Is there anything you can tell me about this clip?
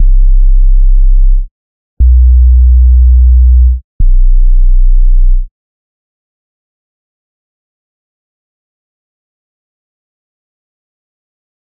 18 ca bassline
These are a small 20 pack of 175 bpm 808 sub basslines some are low fast but enough mid to pull through in your mix just cut your low end off your breaks or dnb drums.
808, bass, beat, jungle